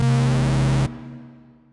This is a wtf sound. Three saw waves with frequency modulation.